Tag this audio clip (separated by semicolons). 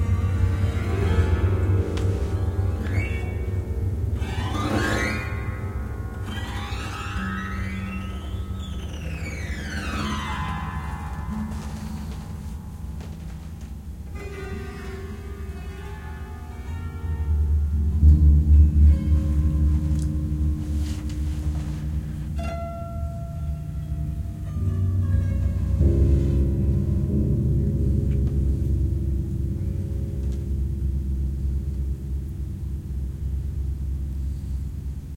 industrial; sound; soundboard; piano